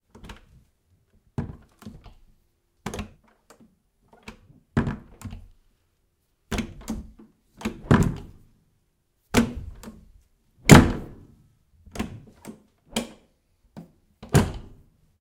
FX SaSc Door 19 Berlin Flat Apartment Bathroom Opem Close 5 Versions
Door 19 Flat Apartment Bathroom Berlin Opem Close 5 Versions
5, Apartment, Bathroom, Berlin, Close, Door, Flat, Opem, Versions